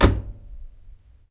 closing washing machine 08
The sound of closing the door from a washing machine.
laundry-dryer, door, washing-machine, tumble-dryer, closing, home, field-recording, clothes, bathroom, closing-washing-machine, ambient, closing-laundry-dryer